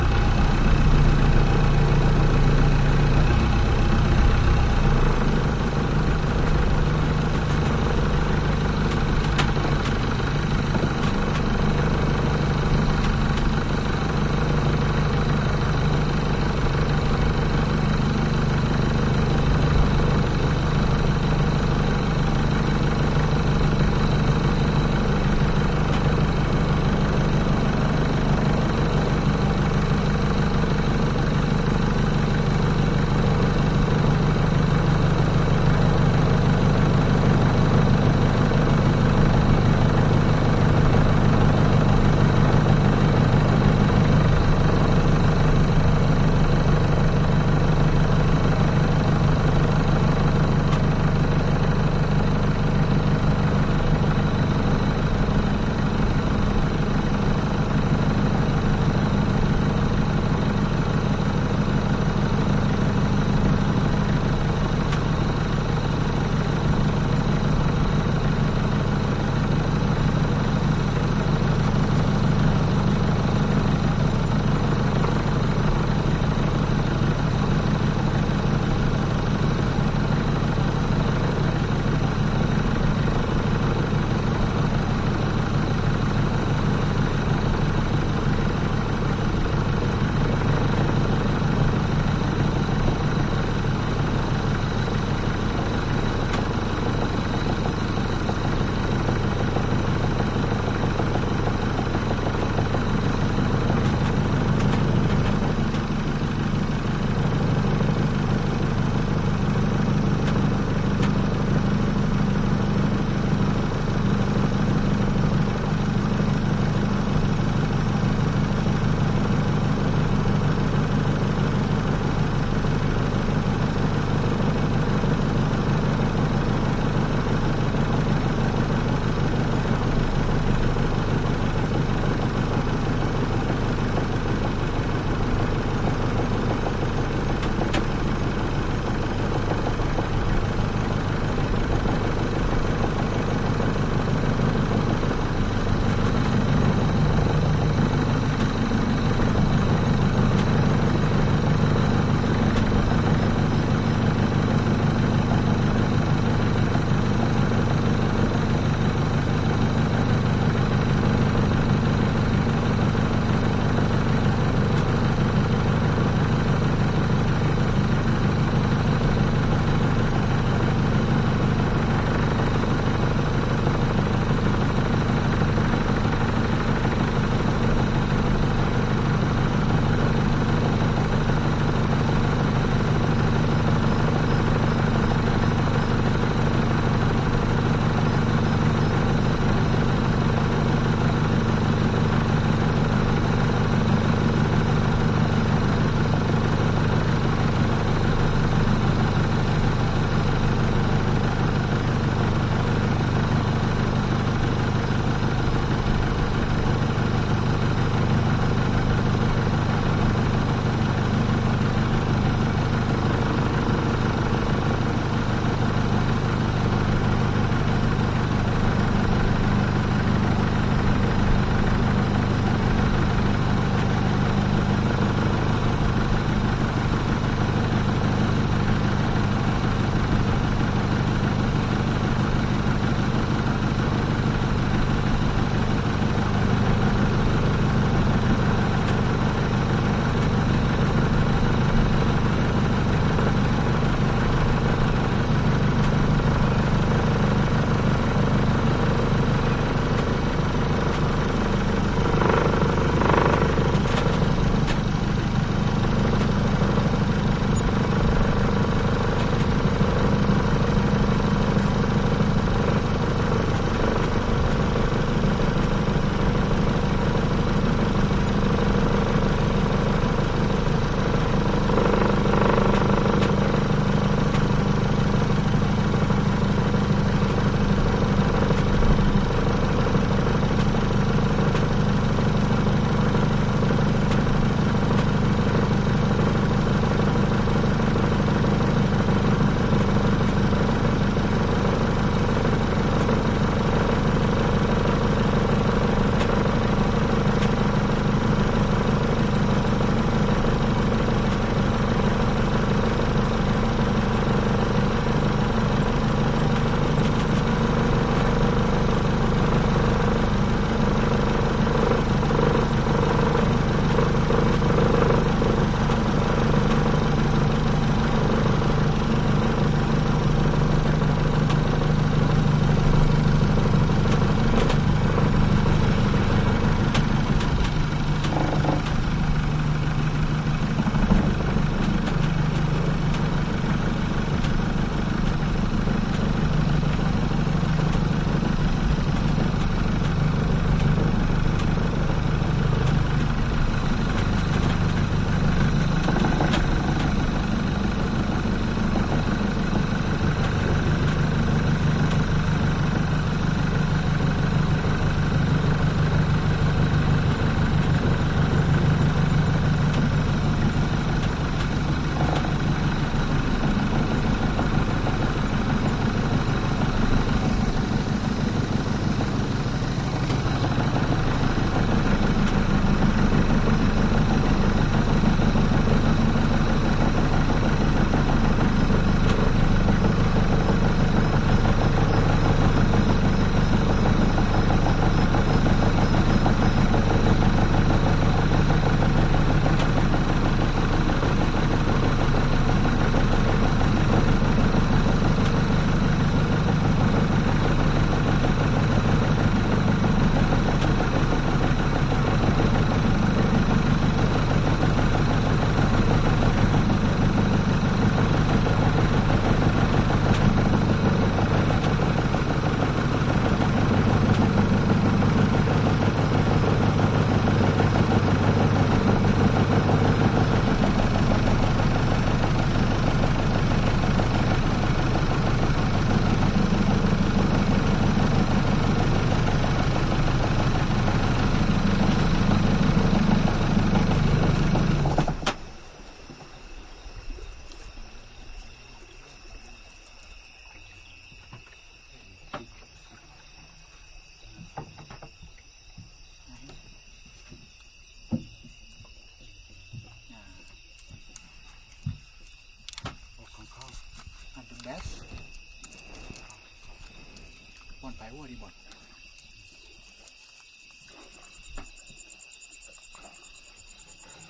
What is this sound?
Thailand longboat rattly motor on board drive slowly, shut off LEFT SIDE OFFMIC RIGHT SIDE ONMIC +some jungle night ambience